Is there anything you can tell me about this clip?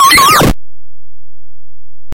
This was generated with the SFXR program. Here for project developing pleasure.
Retro, bit